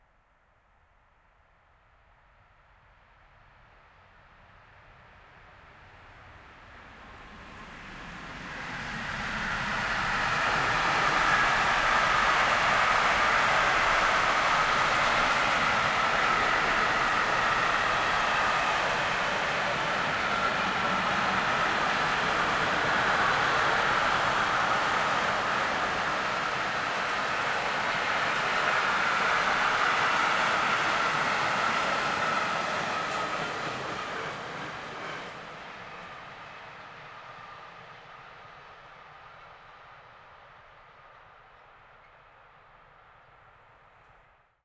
A passing freight train. Recorded with a Zoom H5 with a XYH-5 stereo mic.